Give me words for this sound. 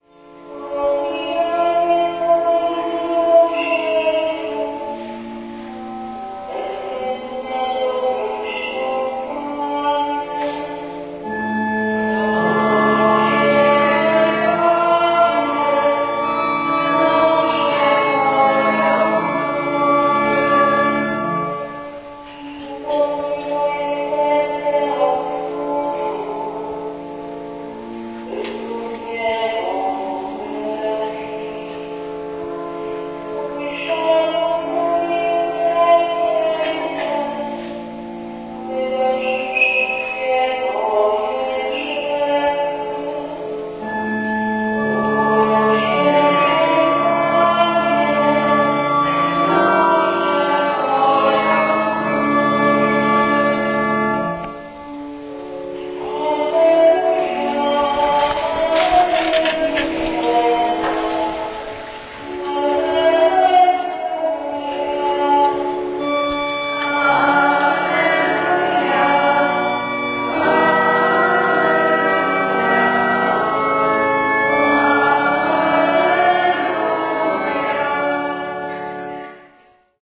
15.05.2010: about 15.00. The Church of the Sacred Heart of Jesus on Cieplicka street in Jelenia Gora-Sobieszow (Lower Silesia in south- west Poland). The requiem. The hymn singing by the nun and the congregation. At the end of the recording - the beginning of Alleluia!